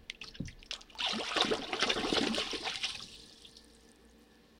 eel fishing 1
Splash made in a plastic utility sink filled with water. No reverb applied, sounds like it's in a small room. Originally recorded for use in a play.
drip, environmental-sounds-research, fishing, liquid, splash, water, wet